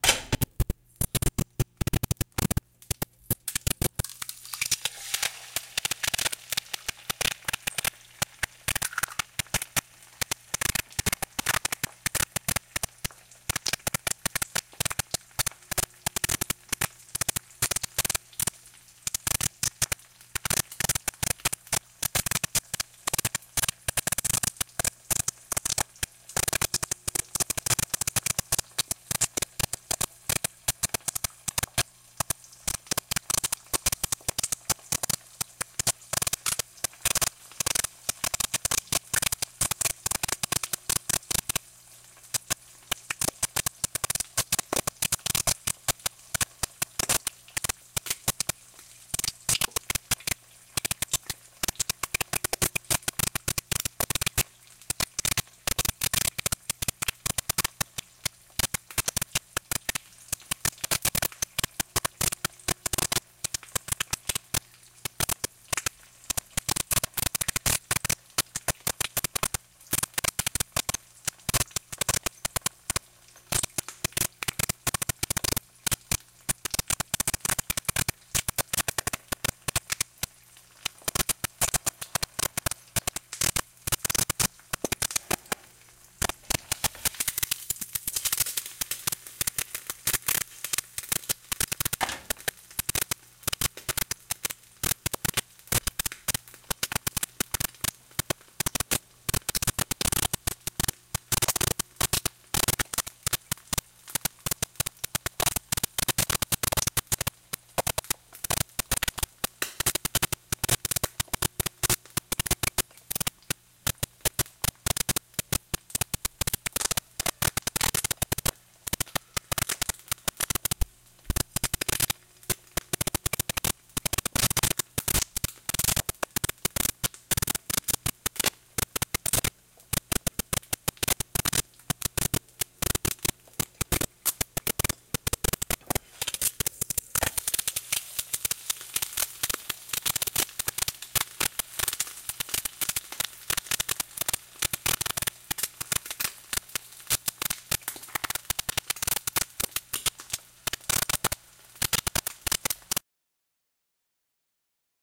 JK Het i Pen
A glitch rhythm sequence.
glitch, manipulation